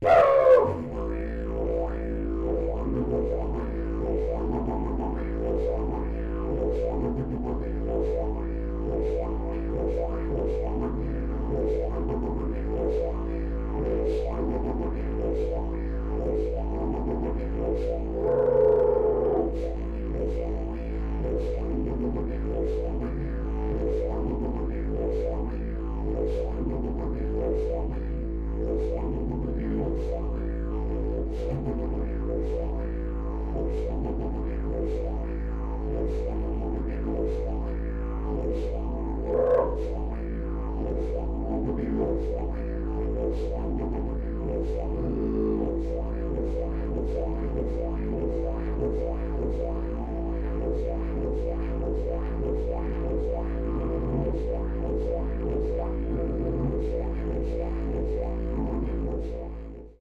This sample pack contains five 1 minute passes of a didgeridoo playing the note A, in some cases looped. The left channel is the close mic, an Audio Technica ATM4050 and the right channel is the ambient mic, a Josephson C617. These channels may be run through an M/S converter for a central image with wide ambience. Preamp in both cases was NPNG and the instrument was recorded directly to Pro Tools through Frontier Design Group converters.
aboriginal, aerophone, australia, australian, didge, didgeridoo, didjeridu, ethnic, indigenous, instrument, key-of-a, native, tube, wind